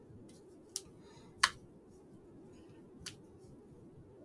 quiet pops 2
sound effects body hands clap snap pop click hit
body, clap, click, effects, hands, hit, pop, snap, sound